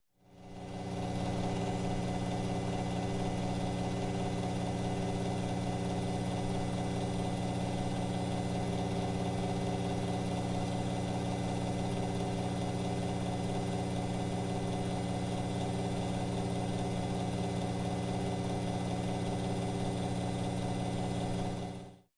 noisy PC
A nearly deceased PC rumbling its last breath.
computer, noise, office, old-computer, pc